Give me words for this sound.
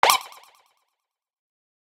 Retro Game Sounds SFX 31
retro, pickup, audio, sfx, shoot, gamesound, game